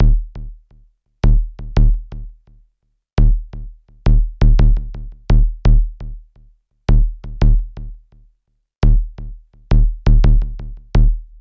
Korg drum M Kick 001
kick drum loop made on a korg drum machine approx 85 bpm
kick, 808-style, loop, beat